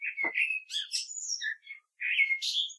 Morning song of a common blackbird, one bird, one recording, with a H4, denoising with Audacity.